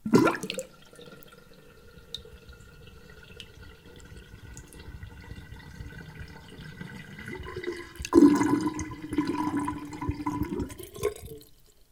Close perspective of water getting out of the sink